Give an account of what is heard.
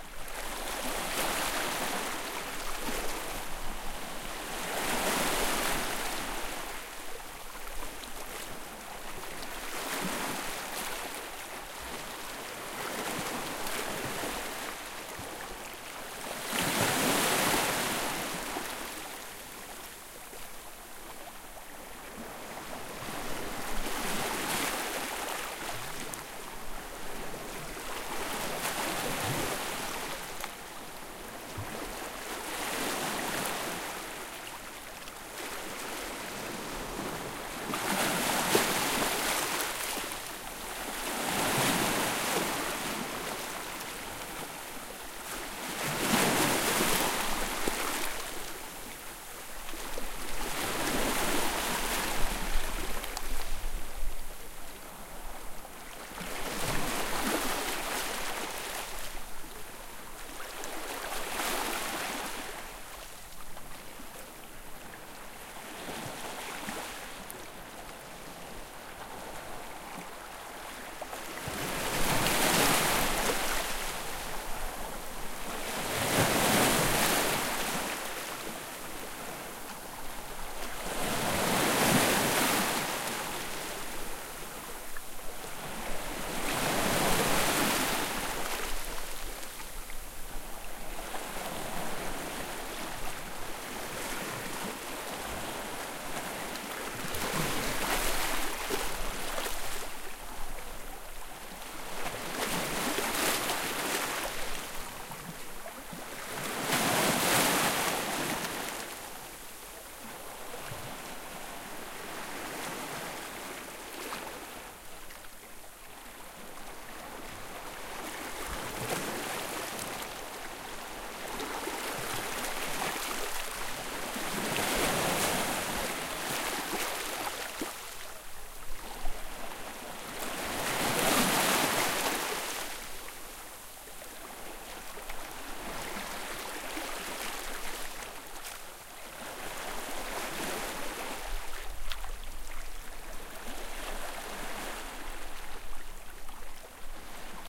Recorded in Santorini, just near the shore, really close to the water. The sounds are made by water hitting large rocks while the hiss is made by the water flowing through little stones.